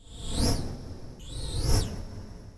hmmmm, pulse wave setting..... and envelope's. w00t.
micron, sfx, synth, whoosh, flyby, space